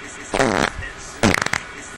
nice fart 2
nascar, car, gas, weird, space, noise